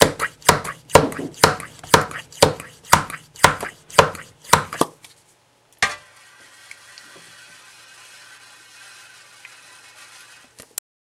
This is an oil sprayer being pumped and then sprayed into a pan.
oil, pump, sprayer